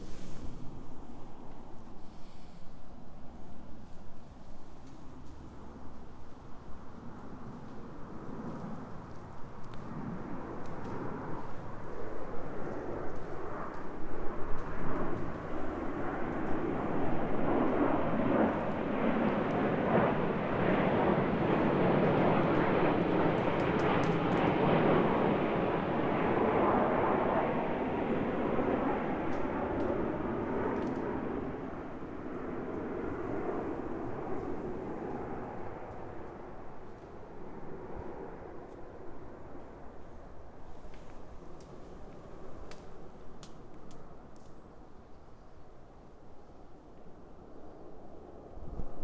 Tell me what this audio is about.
Aircraft passing at low altitude before landing, engine at low regime
landing, plane, aeroplane, low, before, altitude, aircraft, passing